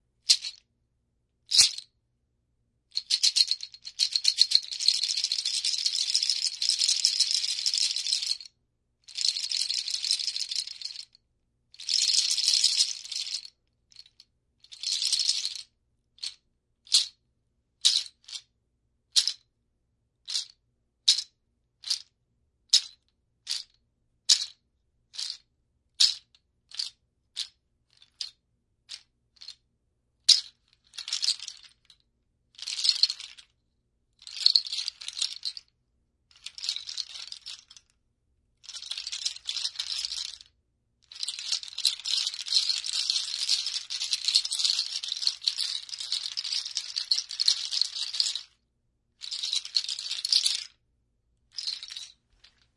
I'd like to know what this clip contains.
nut shaker
Sounds made using a shaker made from nut shells from Africa.
percussion, shake, rattle